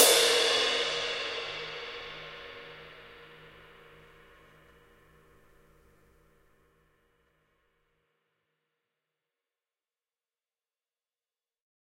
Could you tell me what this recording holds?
Skiba20Wash
A custom-made ride cymbal created by master cymbal smith Mike Skiba. This one measures 20 inches. Recorded with stereo PZM mics. The bow and wash samples are meant to be layered together to create different velocity strikes.